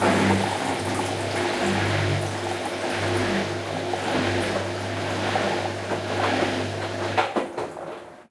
Washing machine 11 rhythm drain
Various sections of washing machine cycle.
washing-machine, machine, water, wash, cycle, washing, soap, rinse